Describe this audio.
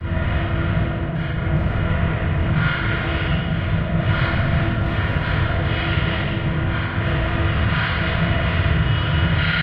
it was voice samples vocoded and re-processed many times in different programs. mainly alot of delay and reverb but some vocoding as well.
effect, distorted, synthesizer, synth, reverb, breathing, distortion, fx, echo, noise, experimental, processed, soundeffect